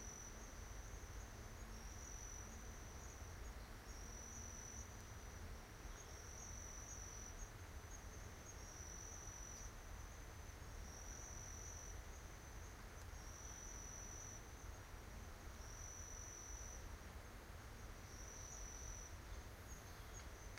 Paronella Park - Crickets
Sitting on a log beside a bush path, listening to the crickets at approximately 3.00 pm.
binaural, crickets, field-recording, insects, nature, paronella-park